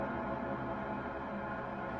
Industrial Strings Loop Noise
Some industrial and metallic string-inspired sounds made with Tension from Live.
dark-ambient, metallic, strings